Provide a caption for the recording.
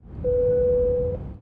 airplane security safe belt tone announcement
Please fasten your safety belts...... 495Hz
airliner, airplane, announcement, belt, cabin-tone, safe, security